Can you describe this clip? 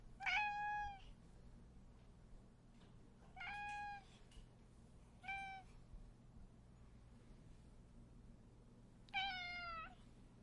Cat Meowing
This is a recording of my cat, Echo, meowing into my Zoom H2n.
animal, cat, feline, meow, pet